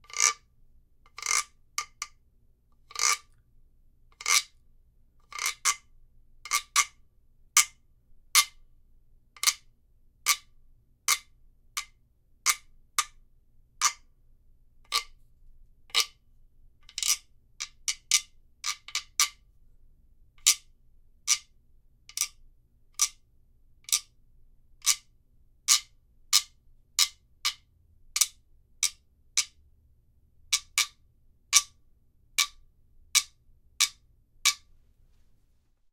wooden fish stick
Sounds from a guiro.
scratch, percussion, guiro, wood, friction